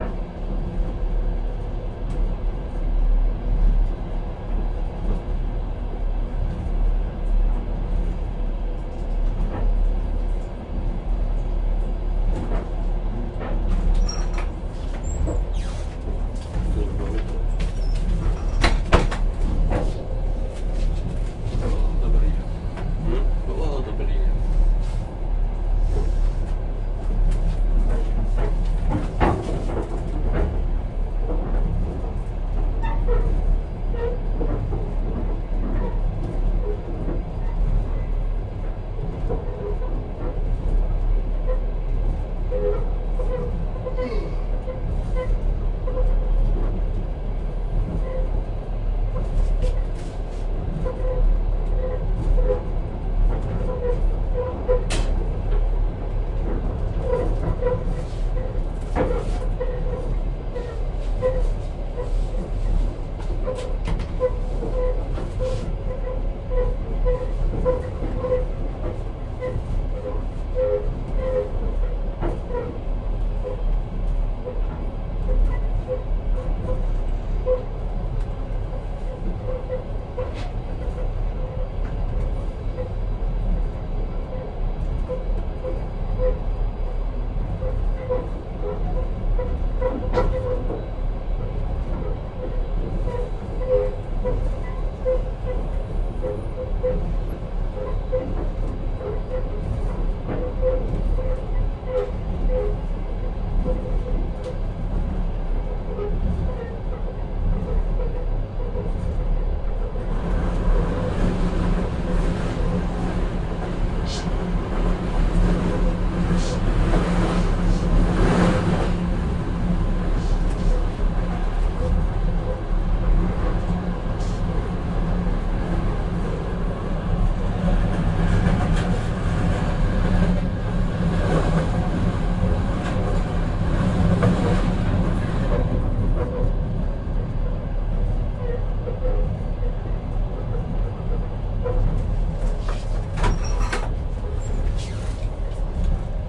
Atmosphere in the tambour front of the toilet (passenger wagon). Someone come in and speak with someone else. Clap the door. Creaks of wagon hitch. When the toilet door opens it changes sound of atmosphere.
Recorded 01-04-2013.
XY-stereo, Tascam DR-40, deadcat